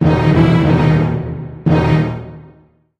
Stereotypical drama sounds. THE classic two are Dramatic_1 and Dramatic_2 in this series.